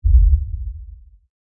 subbass hit 001
One-hit sub bass noise
Recorded with Zoom h2n.
Processed with Reaper
From series of processed samples recorded in kitchen.
one, sub, noise, hit